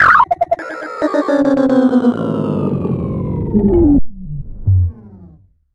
Syserr2-computer totally disagrees
System or Application Message or Notification
screeching, power, fun, dump, fail, absturz, fault, freeze, bluescreen, screech, halt